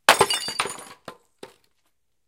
Me throwing a piece of wood onto a concrete patio covered in broken glass.
broken, drop, glass, throw, wood